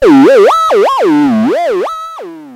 ET-1PitchMadness03
Crazily pitched note hit. Recorded from a circuit bent Casio PT-1 (called ET-1).
bent, circuit, circuitbent, lofi, pitch